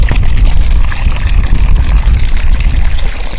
So de l´aigua del parc Font del Peixo.